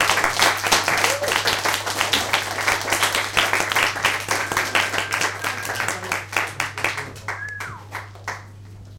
Applause Small Crowd 1
Cheers after a song at a small concert in Loophole club, Berlin, Germany. Recorded with a Zoom H2.
chatter, cheer, cheerful, clap, hand, room, small, talk, whistle